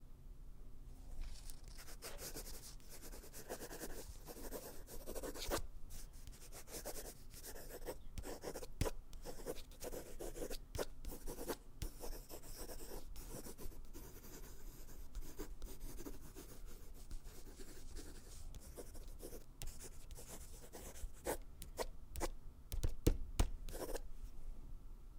Writing with Pencil on Paper
Writing on paper with a pencil.
paper, writing, signature